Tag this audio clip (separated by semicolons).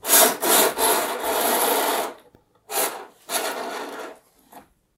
metal; metallic; windows; curtain-rail; slide; scratch; old; curtains